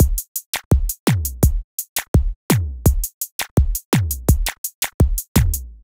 Wheaky 1 - 84BPM
A wheaky drum loop perfect for modern zouk music. Made with FL Studio (84 BPM).
beat, zouk, loop, drum